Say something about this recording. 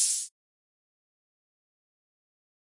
This is the hi-hat of the weirdbreak, a noise wave with high filter resonance.
extraneous, rancid, strange